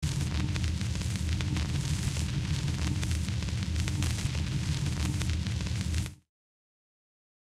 Vinyl Noise, Crackles for Looping
My version sounds like this which I layer at low volume under songs for a Lo-Fi, analog feel. Great for looping.